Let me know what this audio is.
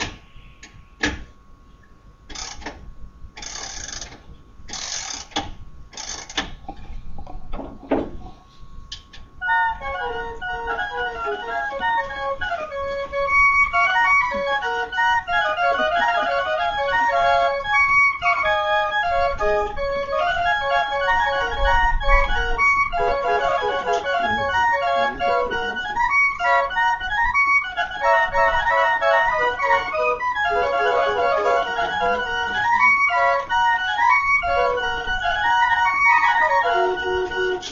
field recording from automaton theater, clock